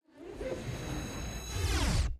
15 IN CH
some, how, all, this, villain, do, boy, nerds, describe, man, a, what, male, nerdy